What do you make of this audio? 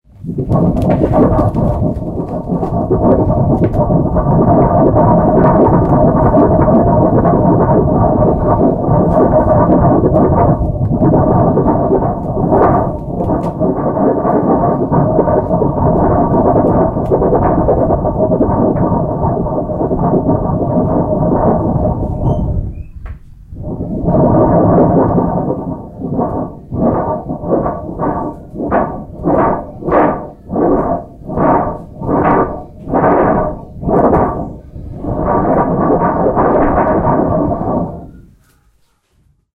lastra percussion thunder
thunder percussion - lastra orchestral instrument
thunder, lastra, storm, lightning, thunderbolt